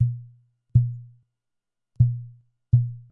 Rhythm played with on a bottle of soda.